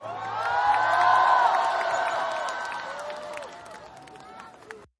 181001 008 crowd cheer
crowd cheer with claps, wow, a little talk at the end
cheering, applause, crowd